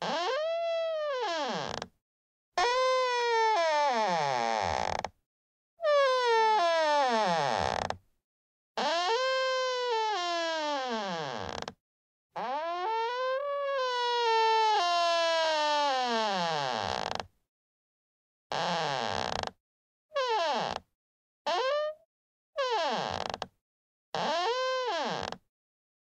French Plastic Window Creaks (x5 Long // x5 Short).
Gear: AKG C411